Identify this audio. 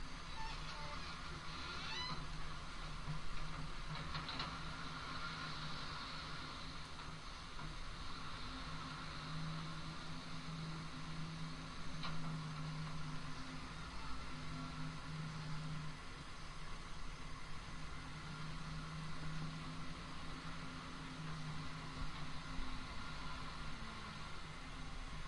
A tractor gathering then moving dirt into a pile
construction, diesel, dig, digger, engine, motor, squeak, squeaky, tractor, worker